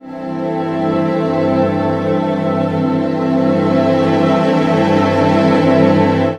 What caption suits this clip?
chord orchestral
Strange chord chopped from some classical piece
I would just like to get note how it works for you and hear it of course.But it is up to you.
chord, orchestral, sample